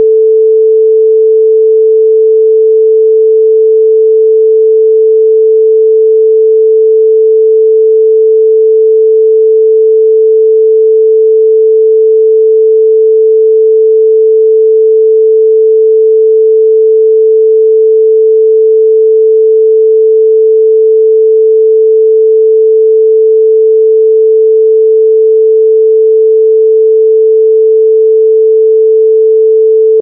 a long sine